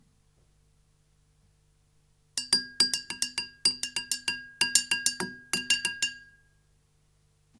Glass ToolAgainstGlassJar Phrase 1
Short phrase from hitting metallic tools against glass jar.
Recorded with an SM57.